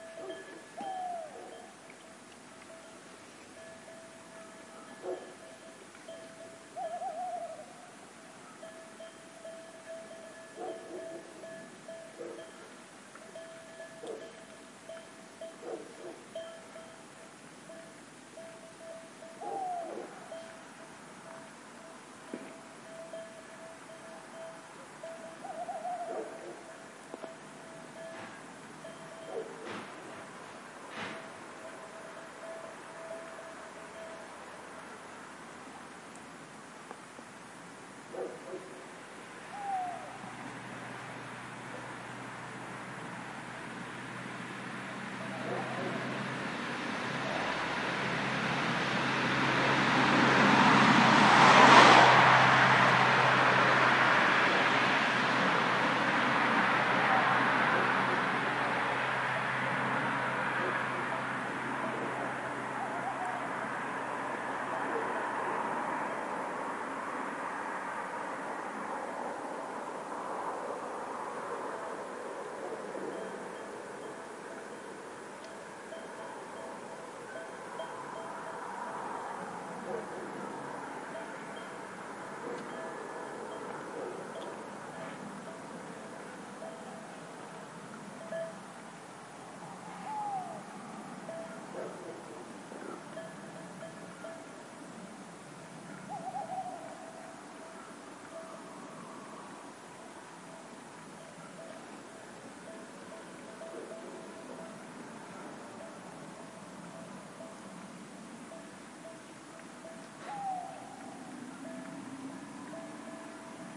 20151231 night.car.05
Countryside ambiance during the night with and a car passing fast, Tawny Owl callings, dogs barking, sheep bells. Sennheiser MKH60 + MKH30 into Shure FP24 preamplifier, PCM M10 recorder. Decoded to Mid-side stereo with free Voxengo VST plugin
night, field-recording, car, dogs, barking, countryside, road, owl, sheepbells